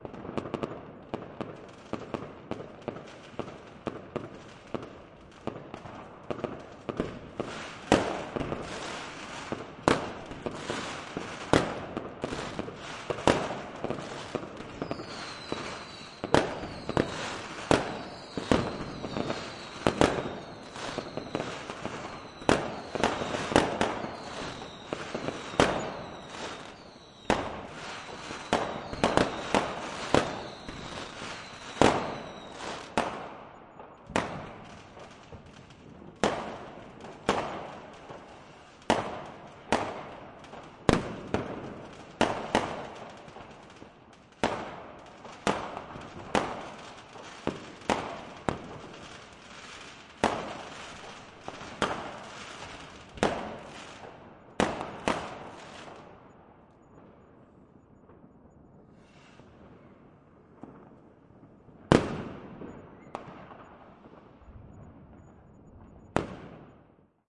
Fireworks New Year's Eve 2016 Vienna [Raw]
Recording of some New Year's celebrations in Vienna.
Captured using a Clippy Stereo EM172 microphone and a Zoom H5 handheld recorder.
I positioned the microphone capsules outside the window on the left and right and then gently (almost) closed it.
I setup the recorder and rolled the whole evening.
This bit was around the apex of the celebrations in that part of town.
Sadly some clipping occured, but I chose to upload the unaltered file.
So no post-processing has been applied.
Cut in ocenaudio.
Enjoy!
pyrotechnics, Silvester, Vienna, Wien, new-year, fireworks, explosions, clipping, recording, celebrations